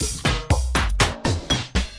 All loops in this package 120 BPM DRUMLOOPS are 120 BPM 4/4 and 1 measure long. They were created using Kontakt 4 within Cubase 5 and the drumsamples for the 1000 drums package, supplied on a CDROM with an issue of Computer Music Magazine. Loop 13 has some funny effect after repeating for a while.
120BPM, drumloop, rhythmic